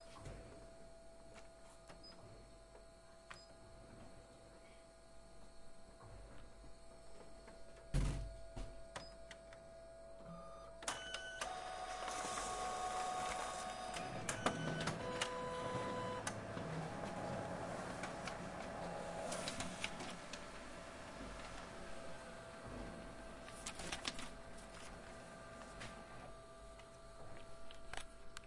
SonicSnap GPSUK Group6 Photocopier
galliard, sonicsnap, cityrings